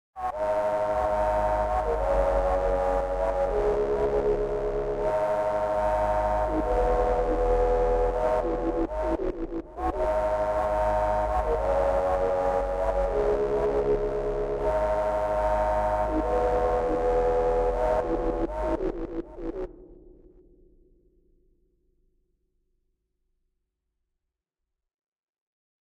uhhohhhrobot-synth
Made on a Europa synth on iOS. Loops of what I consider Robot/Machine type sounds conversing with themselves or each other about something they have done.
Create sounds that are flowing from start to end. Just to be looped again. Patterns appear and they become ambient noise.
techno, music, synth, electronic, robots, loop